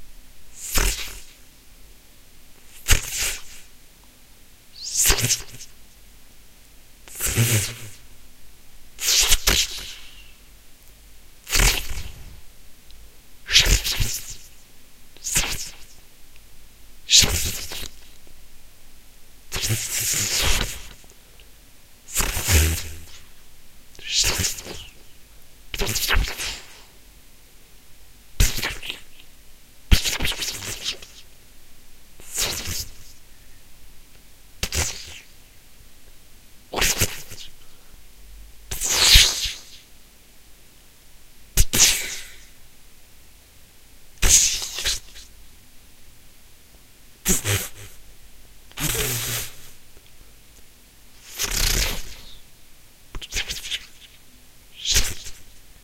Some electricity flashes.